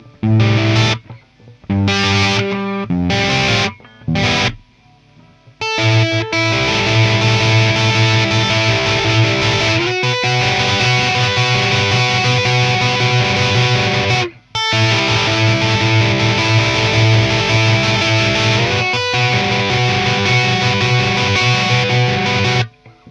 Original live home recording